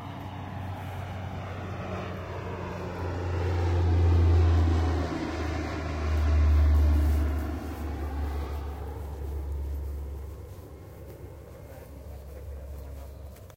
20070825.narsarsuaq.airport.01
airplane passing by
airplane, field-recording